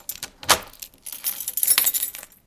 Unlock the door (1)
Unlocking a door with a key. Recorded with a Zoom H2n.
unlock, door, unlocking, key, lock, open, opening